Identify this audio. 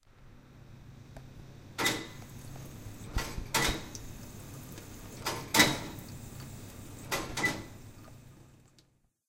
Someone stepping on the pedal of a water dispenser. Recorded with a Zoom H2. Recorded at Tallers on Campus Upf
drink, dispens, water, campus-upf, UPF-CS13